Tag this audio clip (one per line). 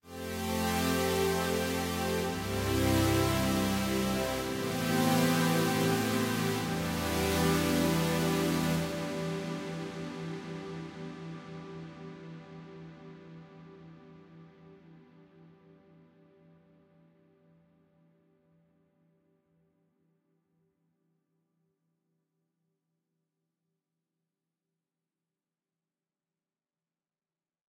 atmospheres
chords